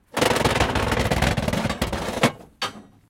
Unsettling rattling
This is a very unsettling rattle, recorded in a bin of ribbed metal bars, free of reverb or background noise. Very usable for various purposes.
metal, rattle, rattling, unsettling